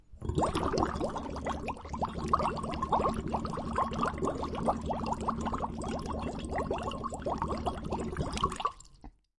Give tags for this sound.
foley; fizzy

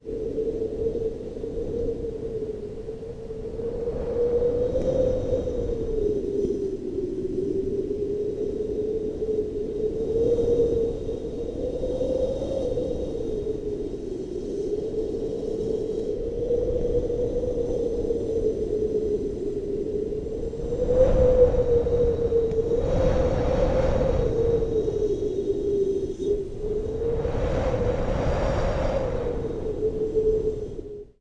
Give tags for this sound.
moderate; winter; tundra; storm; wind

